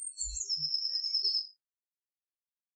Bird Chorus
This sound is of a bird singing.